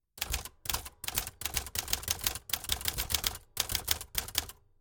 typewriter manual typing
Short typing on a manual typewriter.
Recorded with the Fostex FR-2LE and the Rode NTG-3.
key, manual, mechanical, old, type, typewriter, typing